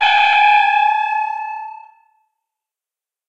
TransporterStartBeep0 STTOS recreated
A relatively close recreation of an iconic sound used mainly for the start of a transporter function in the original series of Star Trek. This is version "0" as opposed to version "1", and thus it has a harder attack and no noise in the oscillator. Created mathematically in Analog Box, polished in Cool Edit Pro.
sttos, transporter